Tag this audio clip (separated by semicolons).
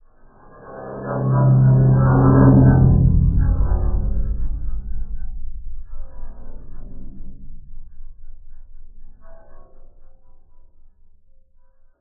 processed; lo-fi; digital; glitch; noise; electronic; experimental